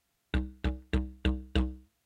didge-tongueslap
5 times a short slapping sound made with the tongue on a didgeridu. No effects added, no edits made. Recorded with Zoom H2n and external Sennheiser mic. Useful as percussive accent.
The money will help to maintain the website:
accent, didgeridoo, didgeridu